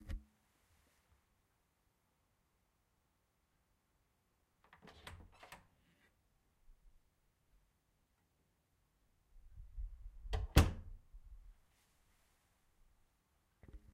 Door opened and closed
A door opened and cosed